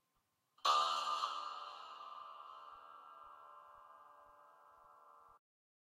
Inside piano contact mic twang
A twanging sound recorded inside a piano with a contact mic
inside-piano, twang, contact-mic